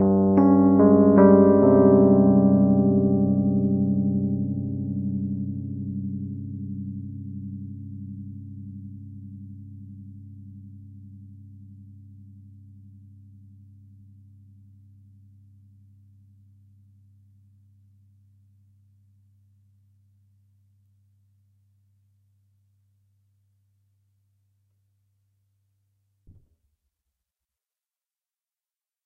rhodes mystery bed 8
Arpeggio chord played on a 1977 Rhodes MK1 recorded direct into Focusrite interface. Has a bit of a 1970's mystery vibe to it.
chord, electric-piano, electroacoustic, keyboard, mysterious, rhodes, suspenseful, vintage